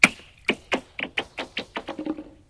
Ice Hit 1
break, crack, foley, ice, ice-crack, melt